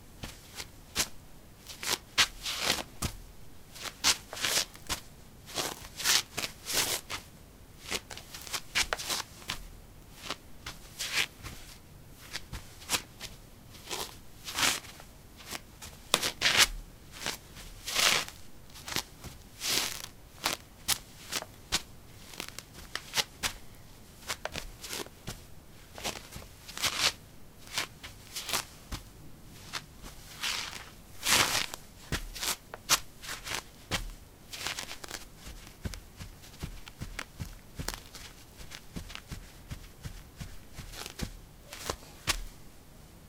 paving 03b slippers shuffle tap
Shuffling on pavement tiles: slippers. Recorded with a ZOOM H2 in a basement of a house: a wooden container filled with earth onto which three larger paving slabs were placed. Normalized with Audacity.
steps, footstep, step